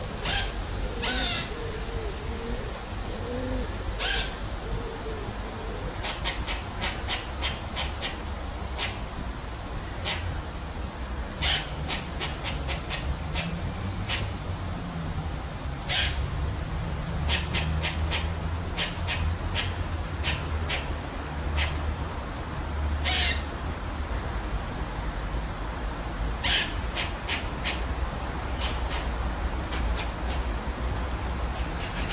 Walking through a park, in the morning. A grey squirrel disapproved because I didn't have any food. This was a binaural recording on a Zoom H1 but there was too much wind noise on the right channel so I only used the left - in effect it's mono.